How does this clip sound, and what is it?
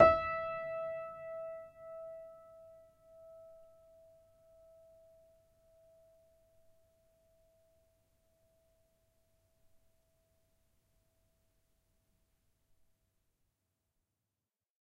upright choiseul piano multisample recorded using zoom H4n
choiseul, multisample, piano, upright